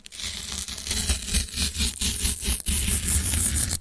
Queneau sctoch
dévidement d'une bande de scotch